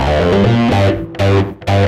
I don't do many "loops" so not sure of BPM. Got the idea while making the Dynabass sample pack and decided to throw these in. Plan on using them to make a song. Edit points might need some tweaking.
electric, guitar, loop, bass